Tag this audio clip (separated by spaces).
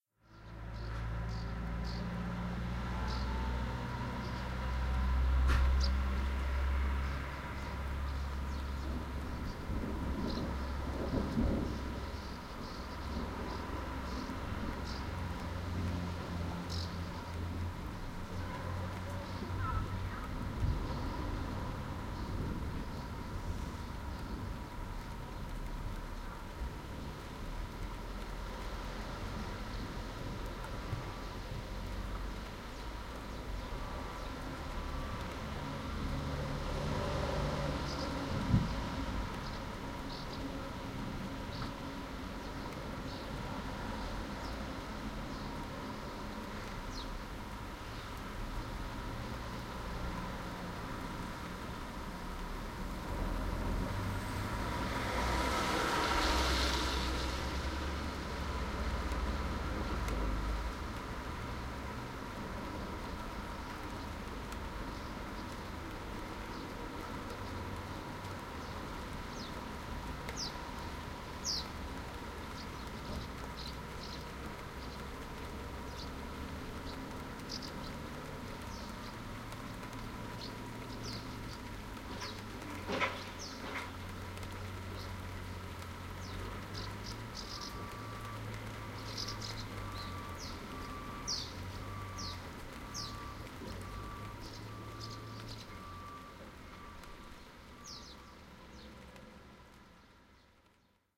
bird-song field-recording rain street thunder traffic urban weather